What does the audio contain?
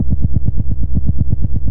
Big Wheel3 140
experimental, noise, broken, industrial, electronic